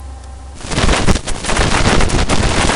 sample exwe 0023 tr
generated by char-rnn (original karpathy), random samples during all training phases for datasets drinksonus, exwe, arglaaa
generative, recurrent, neural, network, char-rnn